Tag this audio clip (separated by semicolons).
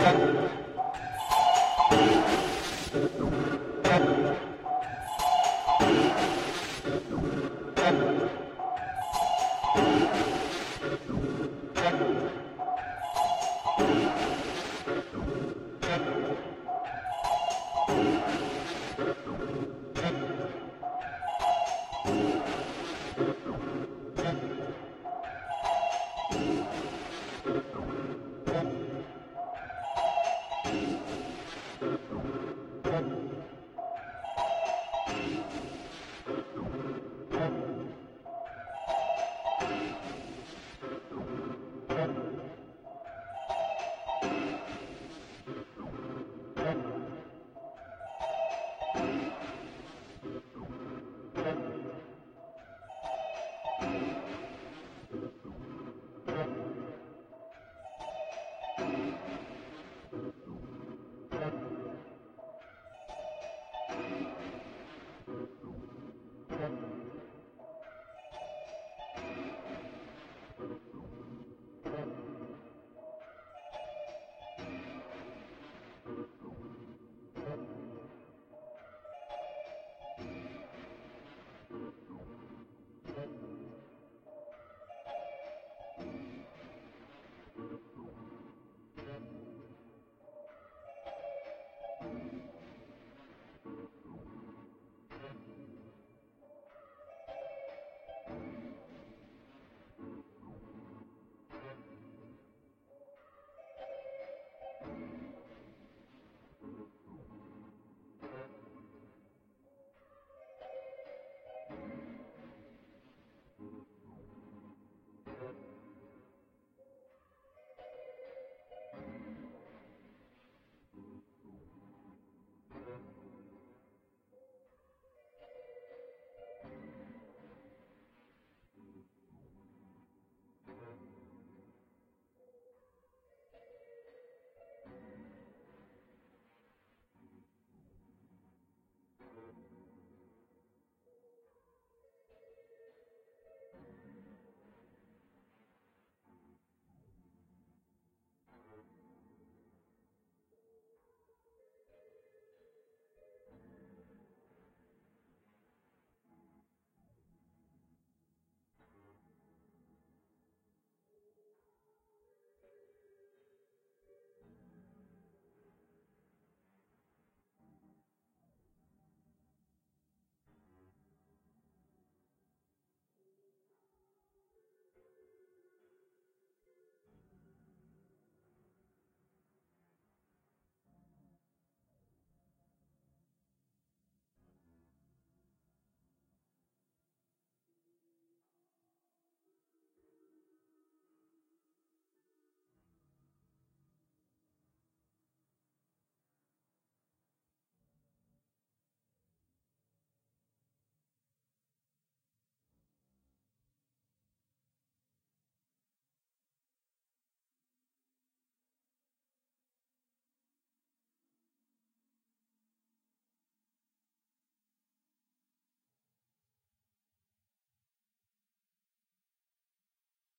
slide; weird; fade; shift; effect; noise; pitch; thatjeffcarter; strange; electronic; 50-users-50-days